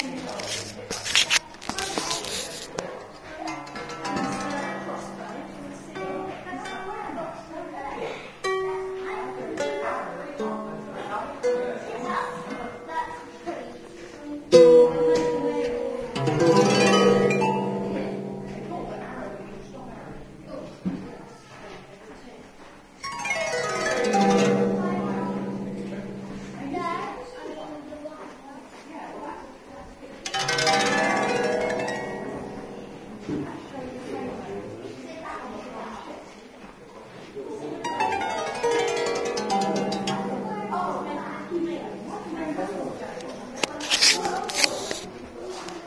This recording was made of a Psaltery at Carisbrooke Castle on the Isle of Wight on the 2nd of June this year. There were quite a lot of people around so bits of it are noisy, but some will be useful. It was recorded on a Treo 650 palm smartphone using Bhajis Loops software.